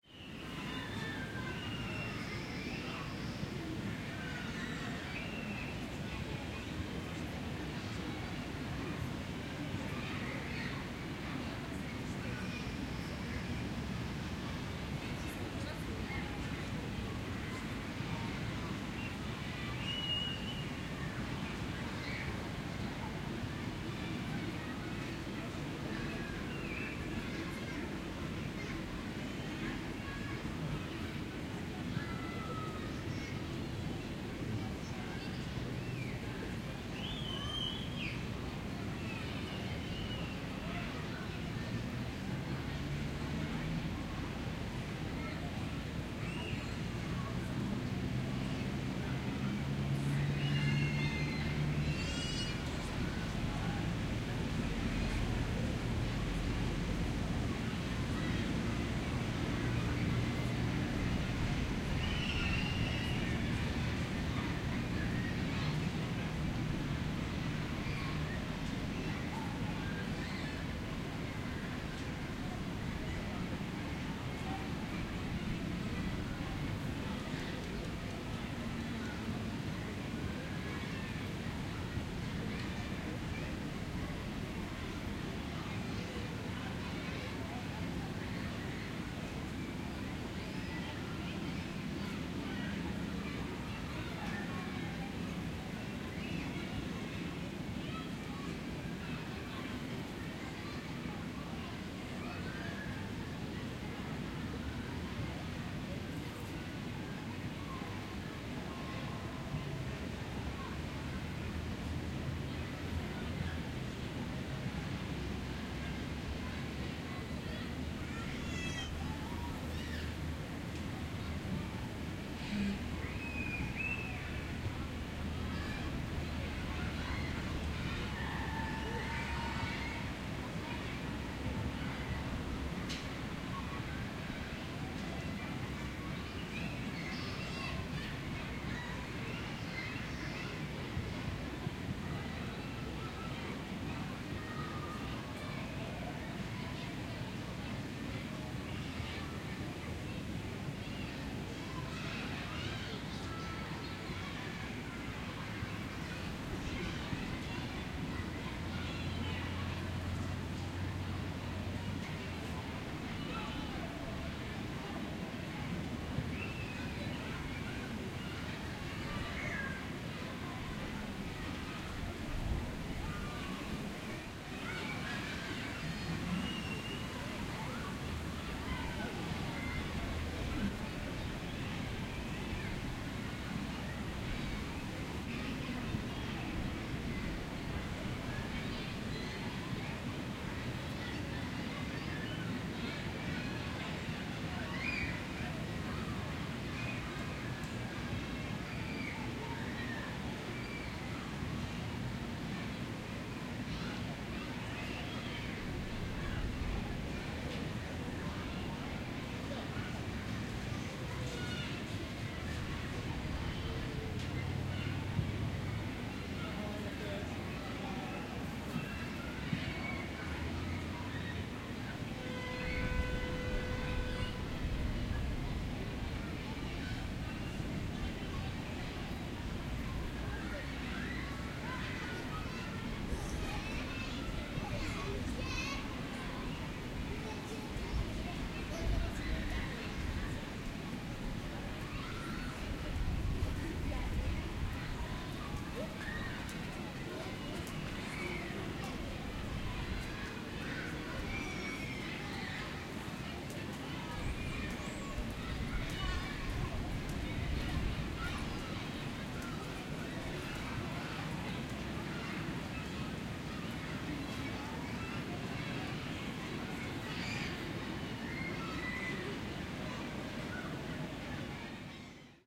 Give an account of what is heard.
open-air swimming pool
Field recording made near open air swimming pool in Poznań, Poland, before noon. Mainly children's voices can be heard, but also people passing-by and some cars and other city sounds. Unfortunately, wind blows were sommetimes strong.
children, city, open-air, Poland, pool, Poznan, sports, swimming